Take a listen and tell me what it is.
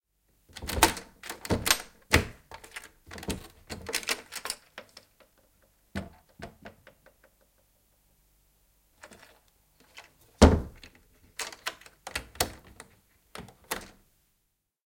Ikkuna auki ja kiinni, mökki / Window, open and close, wooden cottage, hook clatters
Puisen mökin ikkuna avataan ja suljetaan. Haka kolisee.
Paikka/Place: Suomi / Finland / Enontekiö
Aika/Date: 04.05 1980
Field-Recording, Suomi, Finland, Finnish-Broadcasting-Company, Puu, Puinen, Clasp, Yleisradio, Ikkuna, Window, Soundfx, Hook